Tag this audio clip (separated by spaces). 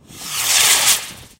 fire firework liftoff model-rocket rocket rocket-engine